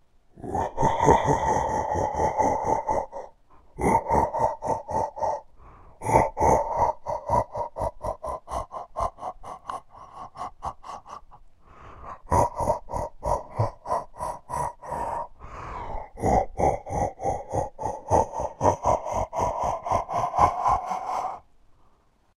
Monstrous Laugh
creepy, disturbing, evil, evil-laugh, evil-laughing, ghostly, haunting, horror, laughing, scary, scary-laugh, villain-laugh